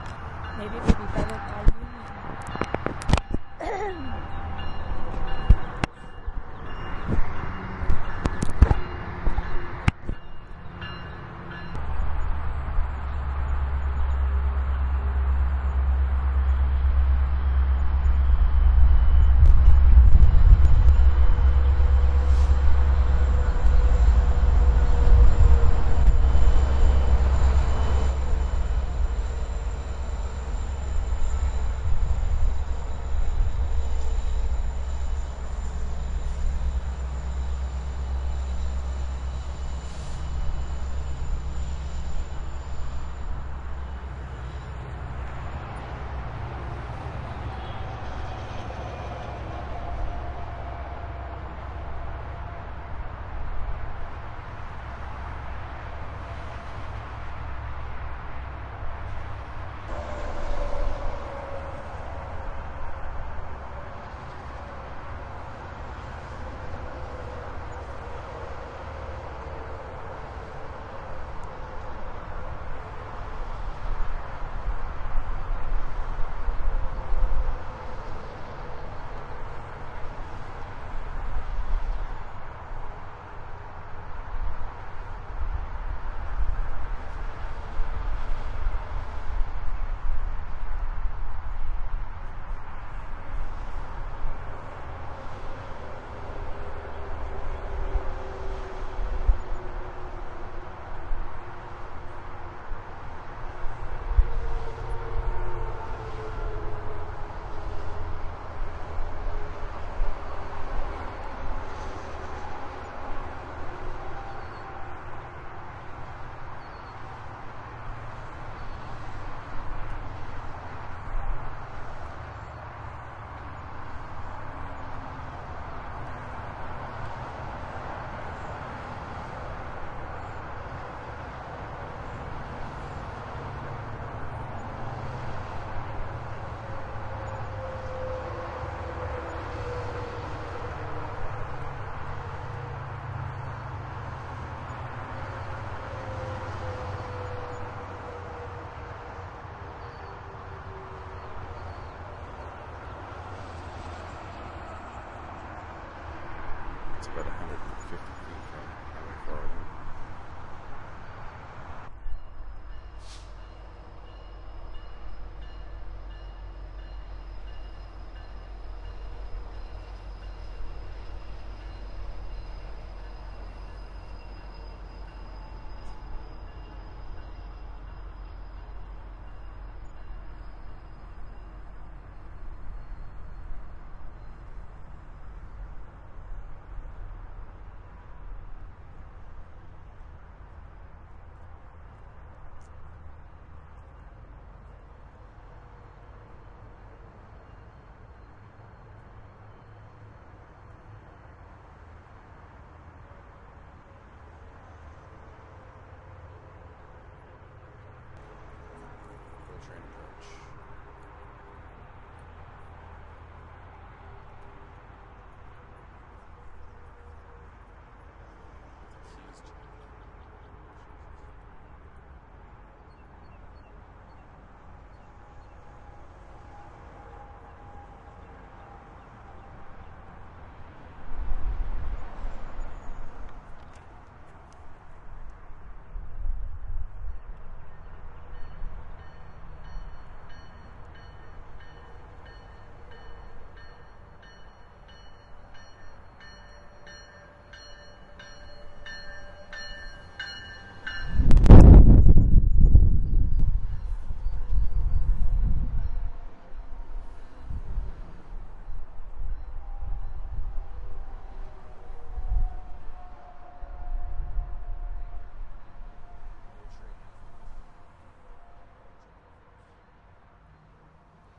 Distant Highway from Train Platform